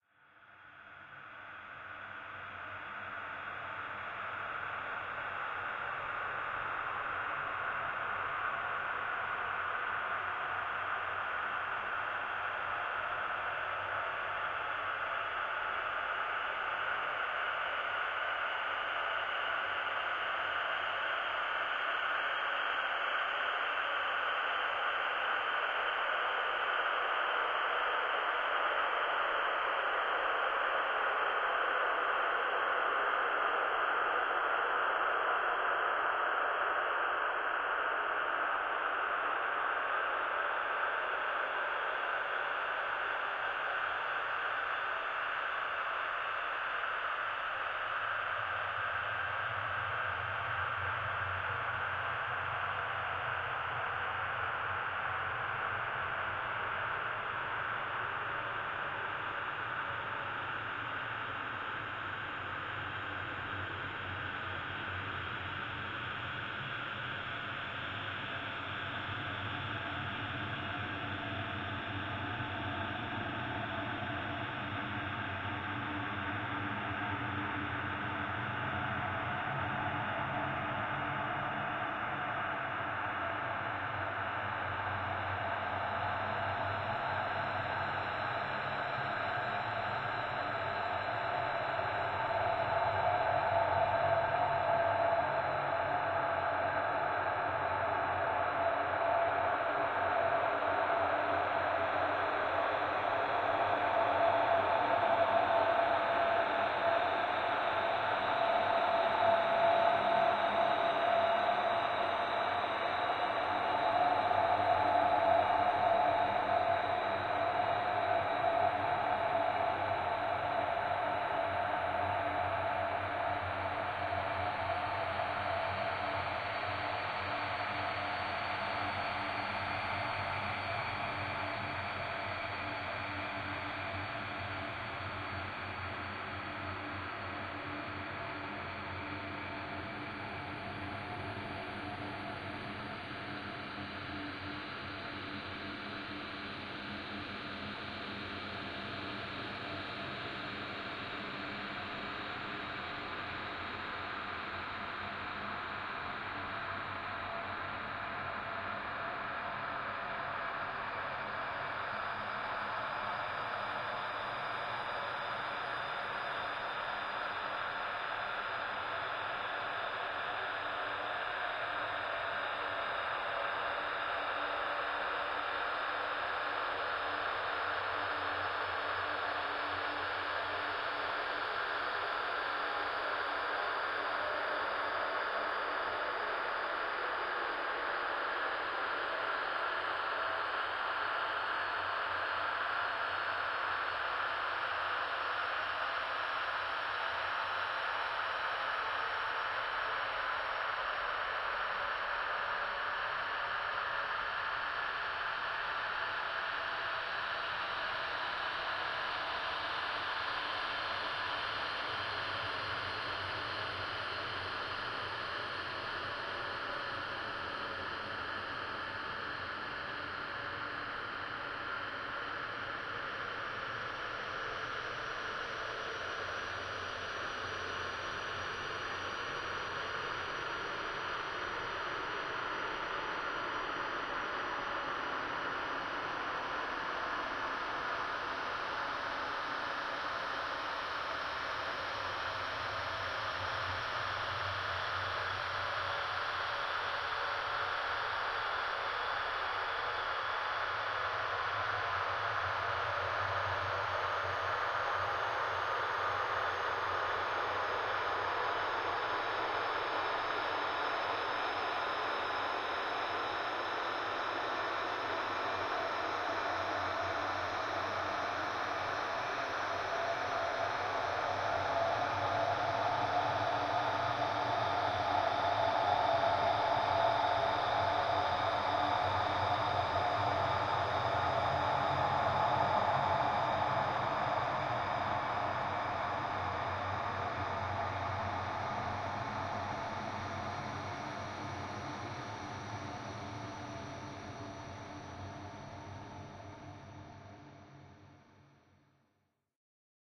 Ambience 11. Part of a collection of synthetic drones and atmospheres.
ambient, atmosphere, drone